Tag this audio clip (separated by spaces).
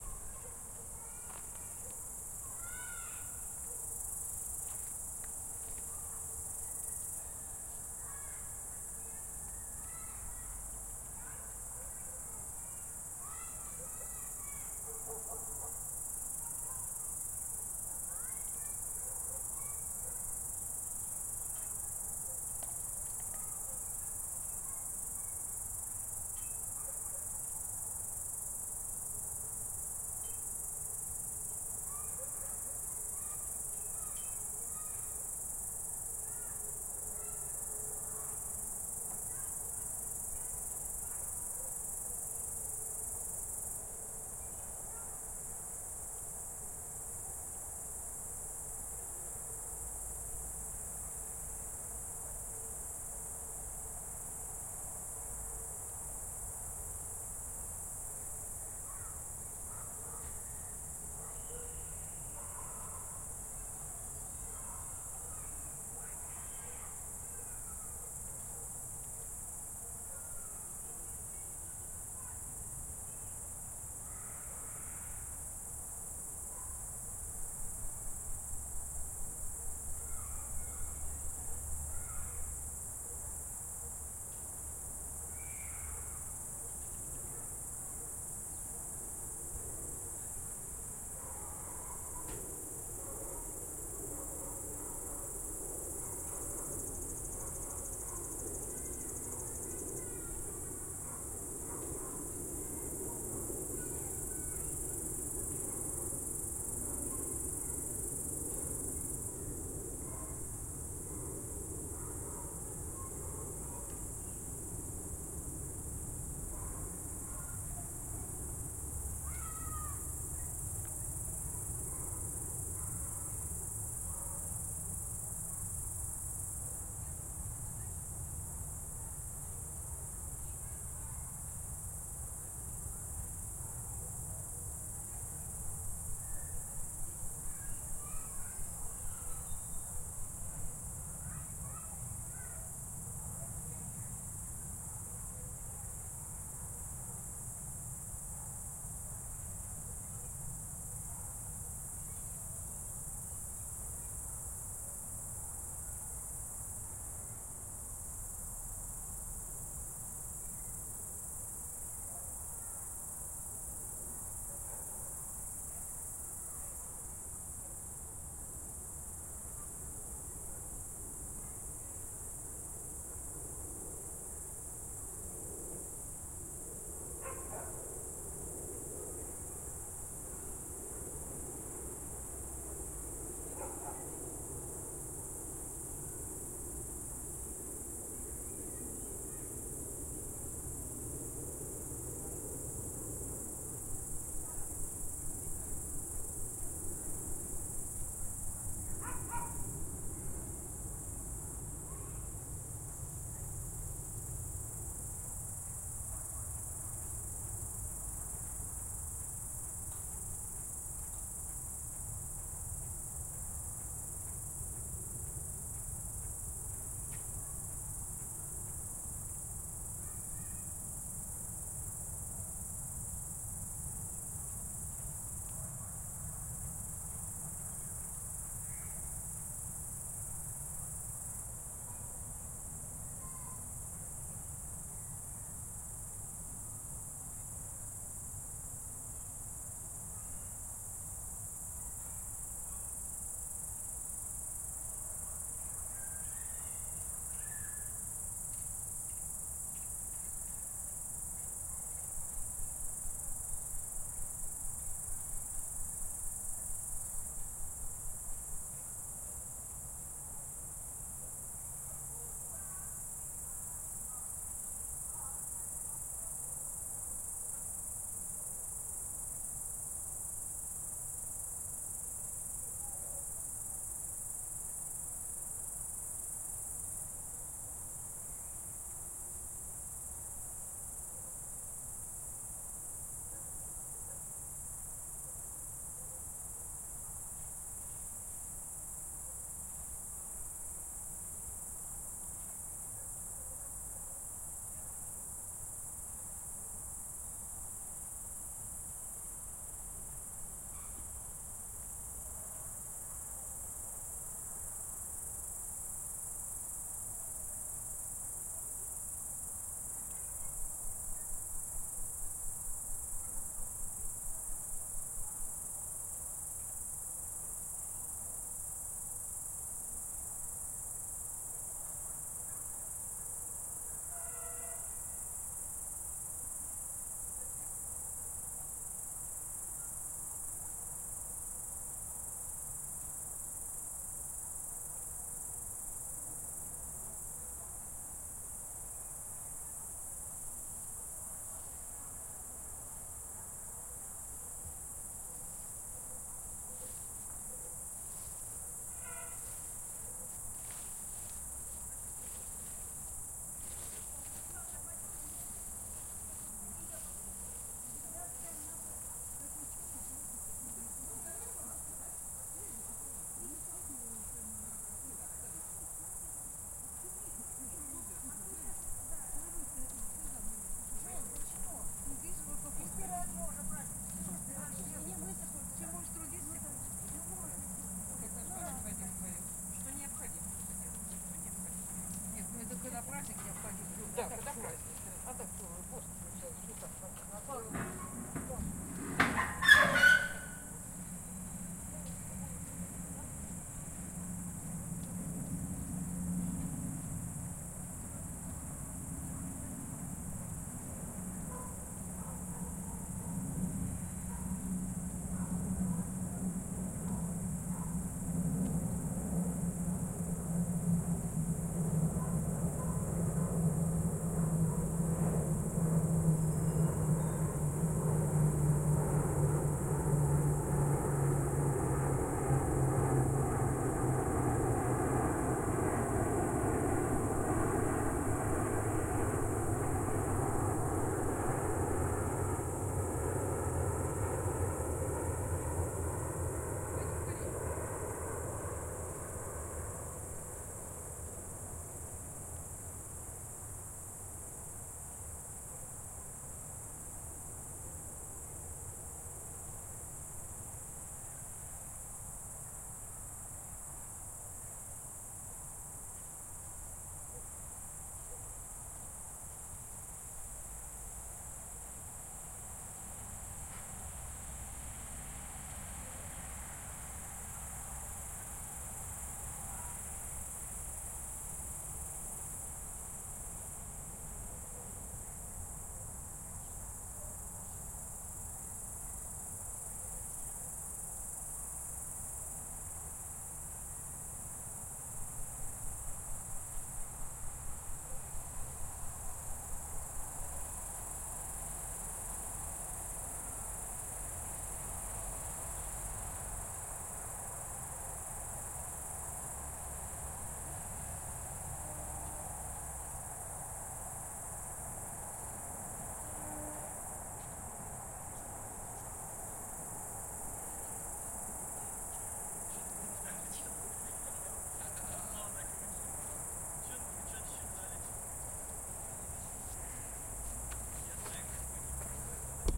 summer recordings crickets field